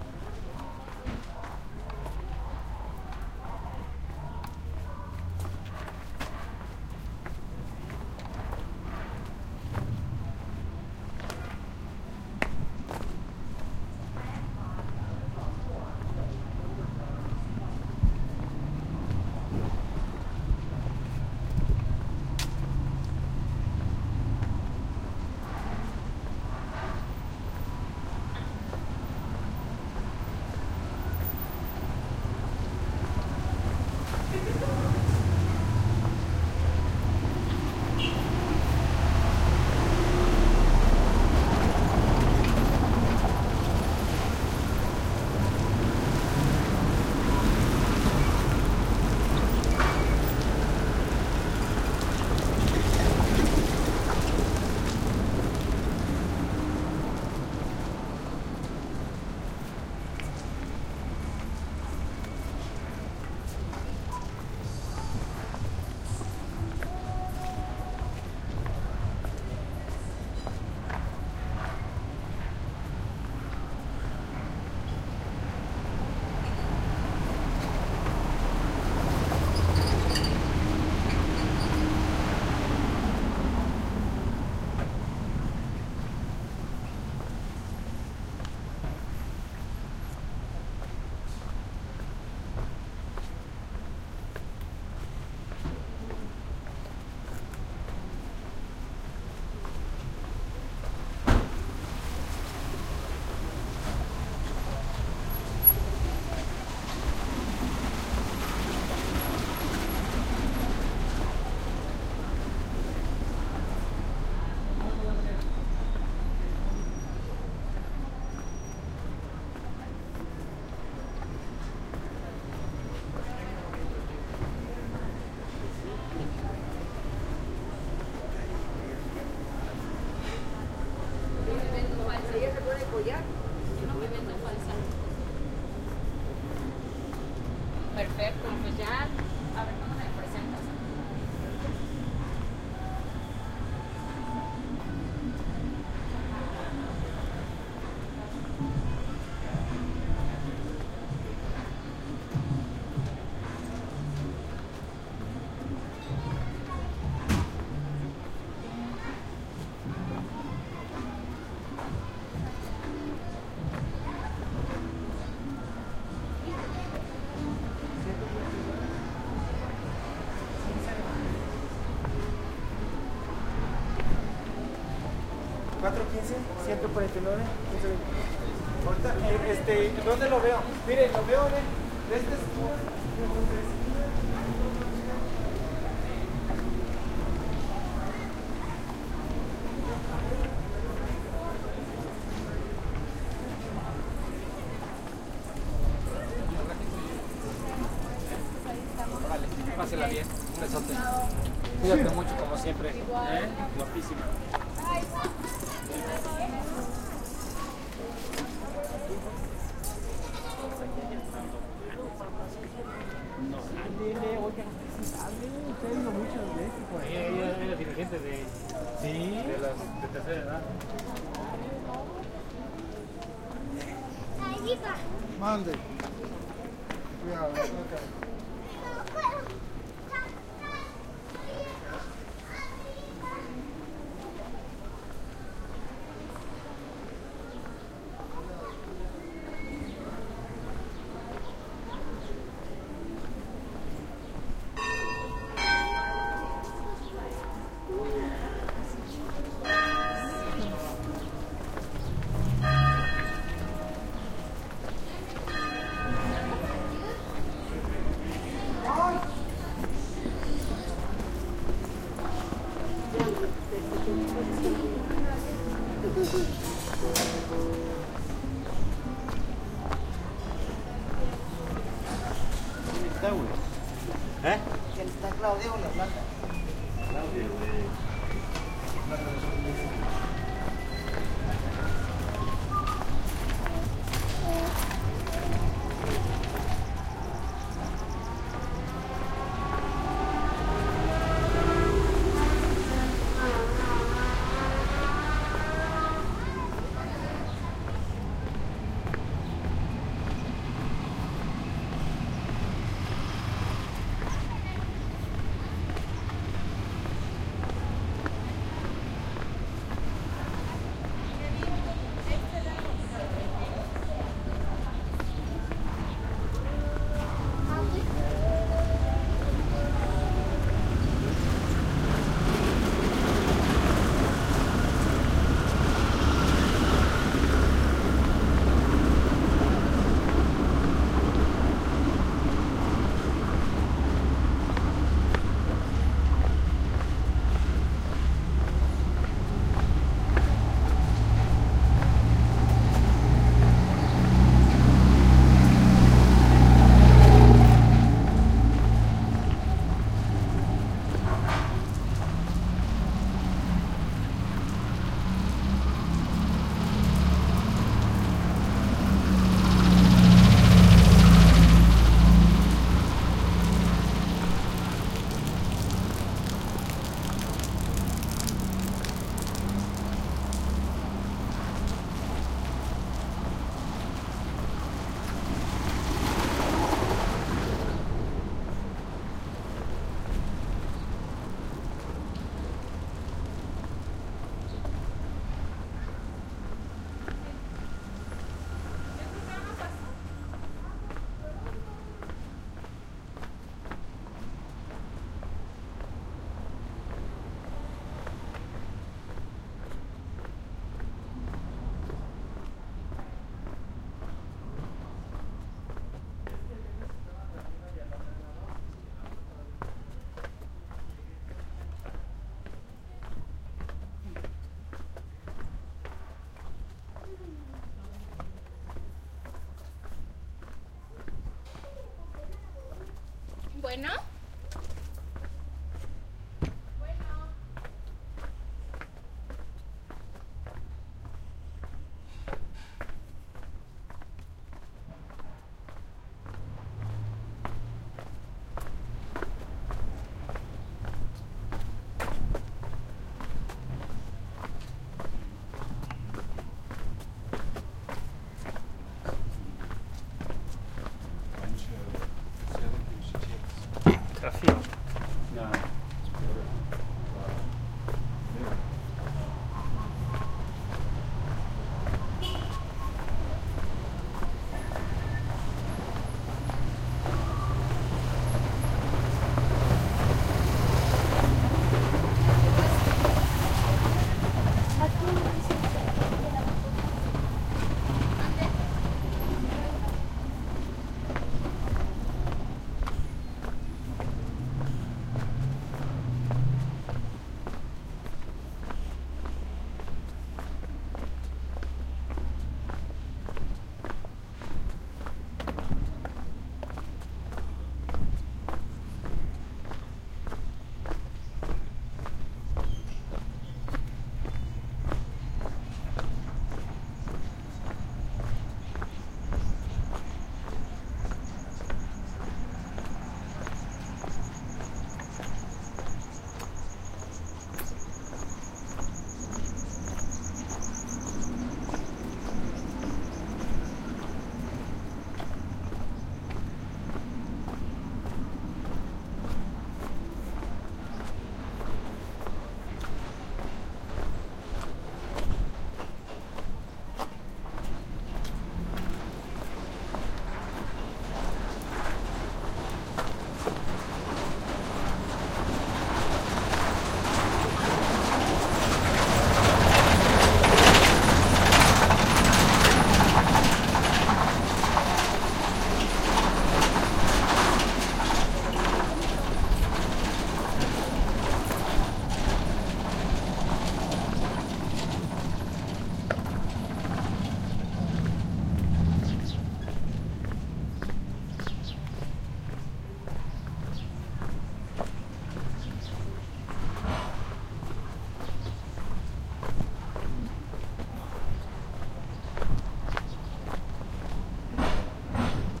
Walking through San Miguel de Allende, MX